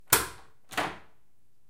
Wood door opening